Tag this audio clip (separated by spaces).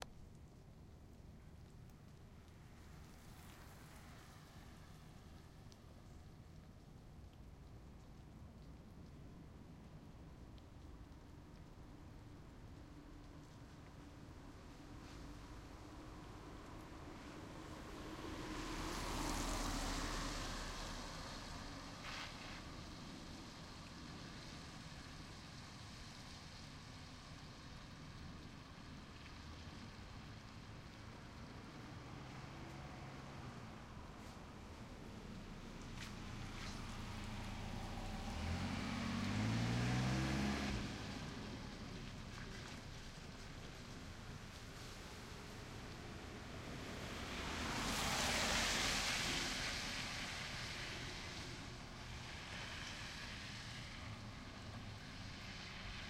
water splash